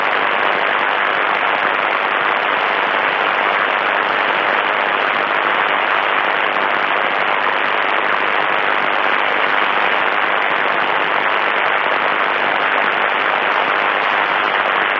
radio noise 4
Another radio noise recorded in 80m band.
80m, ic-r20, noise, radio